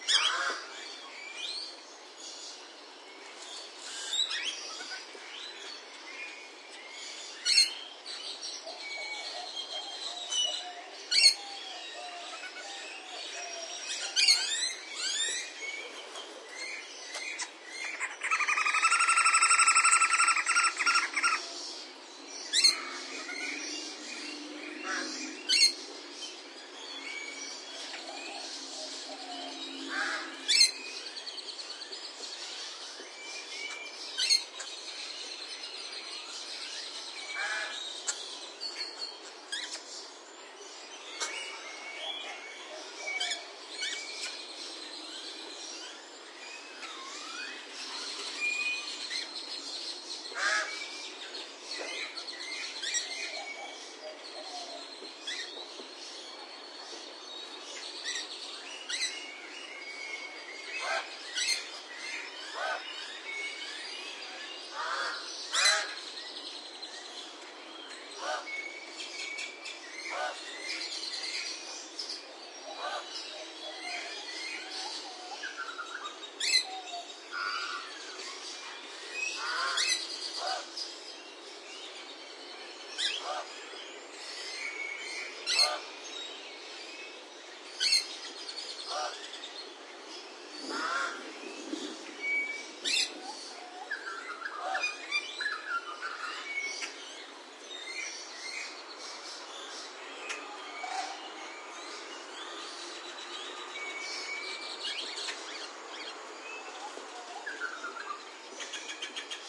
saz birds
A large variety of birds calling including Dusky-headed Conure, Pied Crow, White-necked Raven, Green Wood-hoopoe, Australian Magpie, grackles and lorikeets.
aviary, birds, conure, crow, exotic, grackle, magpie, parakeet, raven, tropical, zoo